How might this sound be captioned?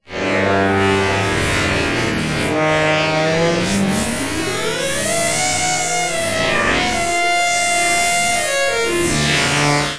An emulation of an electric guitar, synthesized in u-he's modular synthesizer Zebra, recorded live to disk and edited and time-stretched in BIAS Peak.